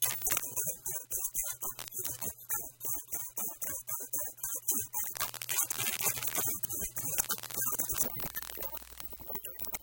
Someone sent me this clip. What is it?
vtech circuit bend040
Produce by overdriving, short circuiting, bending and just messing up a v-tech speak and spell typed unit. Very fun easy to mangle with some really interesting results.
speak-and-spell, digital, noise, circuit-bending, micro, broken-toy, music